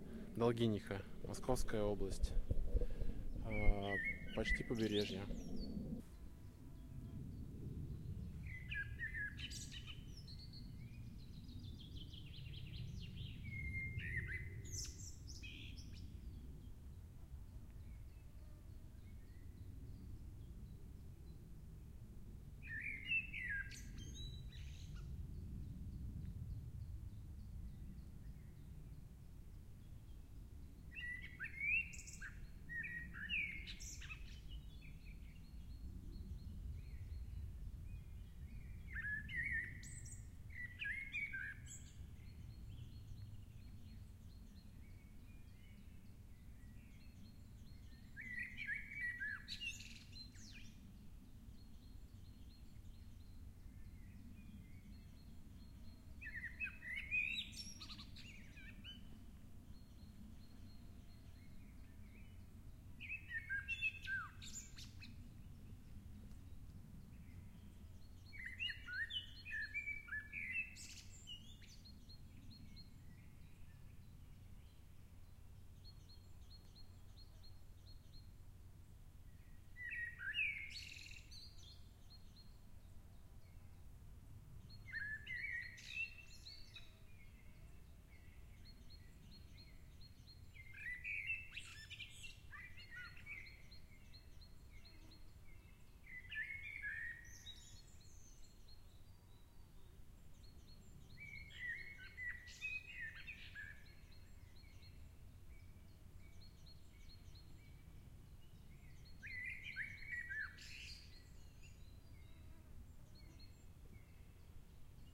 ambience, forest, shore, reeds, bulrush, province, Dolginiha
Recorded using Zoom H5 XYH-5 mics. Shore near Dolginiha (near Moscow)